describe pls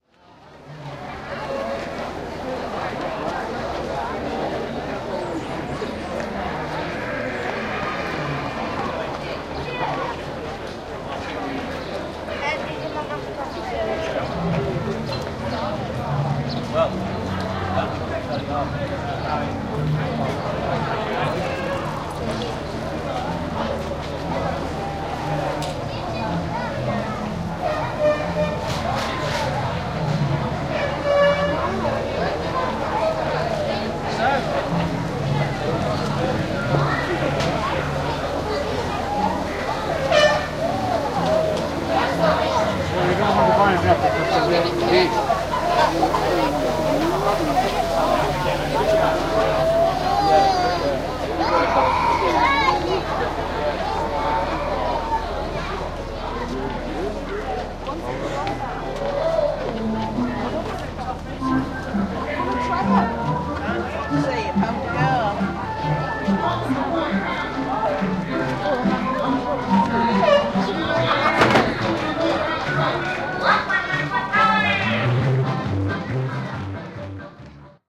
STREET AMBIENCE 1
This is one of three stereo images that I captured on the 24th May in Market Weighton in East Yorkshire at an annual village event called "The Giant Bradley Day". It was a very hot day and I wandered up and down the crowded main street amongst stalls, food sellers and children's entertainers.
ambient, crowd, fayre, fete, happy, people, street, summer, yorkshire